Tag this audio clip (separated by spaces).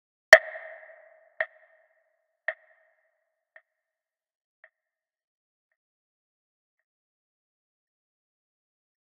electronic slap